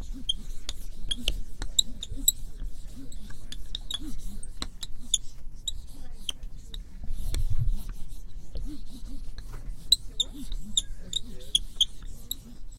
Writing on a white board in my office in marker